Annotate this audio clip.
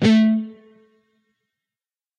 A (5th) string, on the 12th fret. Palm mute.